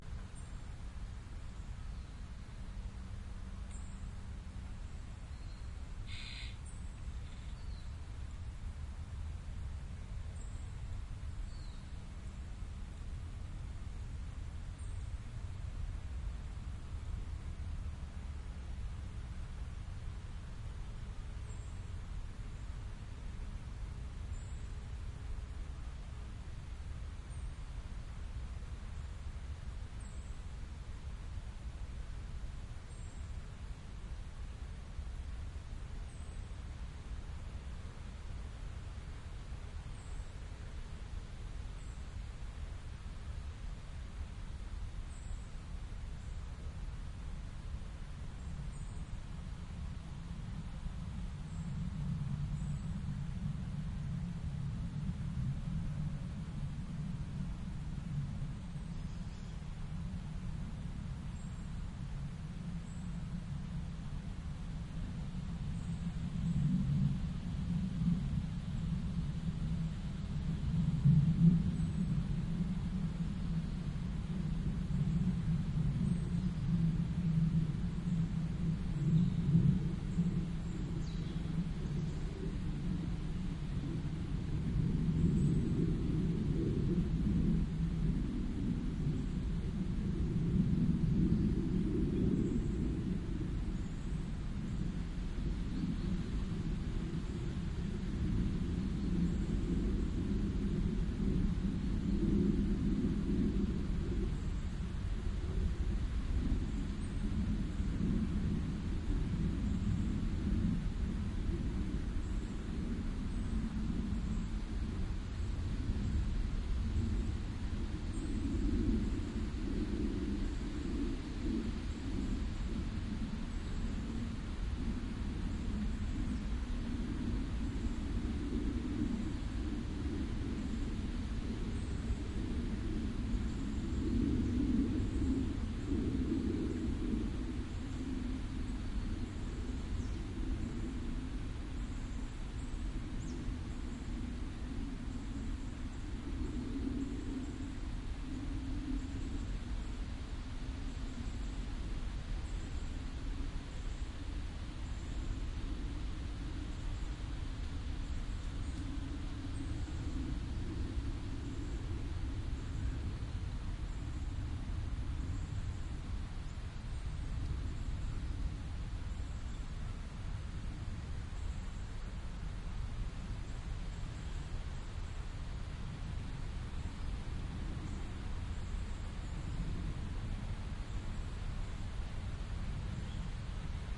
forest near moms house
Sitting down in an open space in a small forest. The winds are rustling the leaves, creating that particular noise background for the recording. An airplane can be heard passing overhead. Binaural microphones into minidisk.